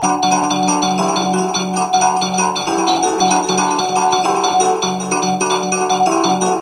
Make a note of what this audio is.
A few tones to play with. You may build something on these sequencies
phrase, melody, sequence